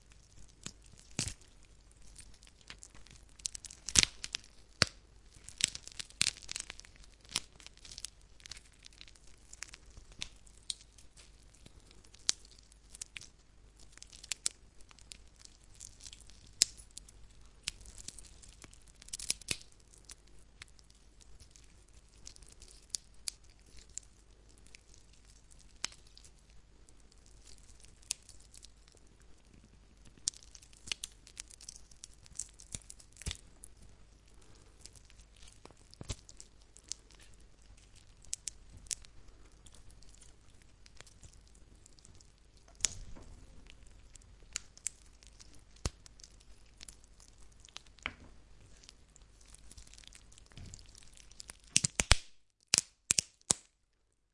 Chicken Bone Break
Breaking the bones and tearing through the raw meat of a chicken.
Recorded with a Zoom H2. Edited with Audacity.
blood, bone, break, breaking-bone, butcher, cadaver, cooking, crack, crackling, crunch, crush, dead-bird, filet, flesh, goo, gore, gross, kitchen, meat, raw, raw-meat, slime, slimy, squish